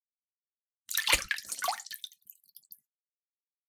Water pouring 9
pouring, sound-fx, splash, Water, water-drops